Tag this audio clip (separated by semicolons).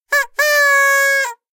alarm; klaxon; Vuvuzela